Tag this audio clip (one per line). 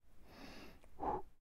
office
animation
foley